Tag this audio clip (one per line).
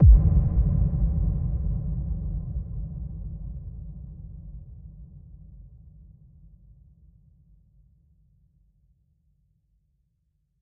collab
drum
Icebreaker
kick
loops